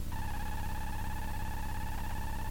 Electronic beeping like from a computer.
Recorded from a Mute Synth 2. Sorry about the noisy recording.